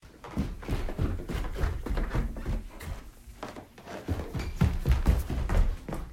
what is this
Walking down Stairs
walking down the stairs
foot, stairs, walk